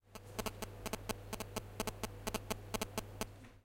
Unintentional recording of the obnoxious beeping noise an old type mobile phone (Ericsson R320S) can make on your radio, computer and other equipment
beep, mobile, radio, interference, phone